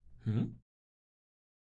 Suspiro Intriga
duding human sigh
dude, human, sigh